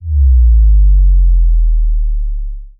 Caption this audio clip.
Bajo Final
Bajo transicion dembow